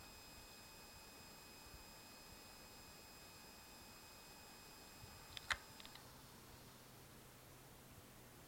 20060907.iRiver.HP120.external.noise
The sounds produced by an iRiver HP120 jukebox as it starts recording (8 s). Sennheiser ME66 > Shure FP24 > iRiver HP120. The mic was directly pointed at the minidisc, at some 5 cm. Note: this recorder had the rockbox firmware loaded, this reduces considerably the time the hard disk is spinning (relative to iRiver original firmware) before going quiet.